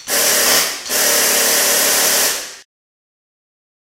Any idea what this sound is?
Estlack pneumatic 1
pneumatic high-speed drill
hydraulic, machine